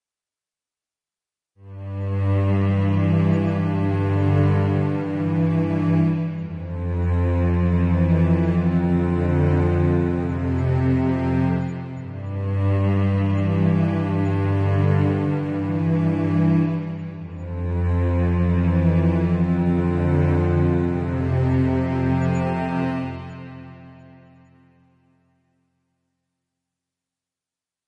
cinematic vio3
made with vst instruments by Hörspiel-Werkstatt Bad Hersfeld
ambience, ambient, atmosphere, background, background-sound, cinematic, dark, deep, drama, dramatic, drone, film, hollywood, horror, mood, movie, music, pad, scary, sci-fi, soundscape, space, spooky, suspense, thrill, trailer